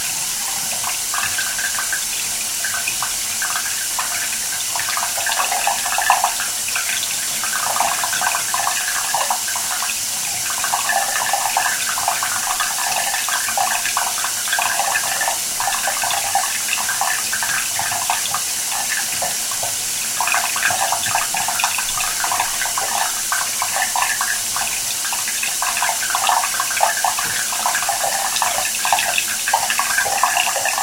water gurgling and water tap
Water gurgling bath overflow hole + noise of the water tap.
bath, gurgling, overflow-hole, water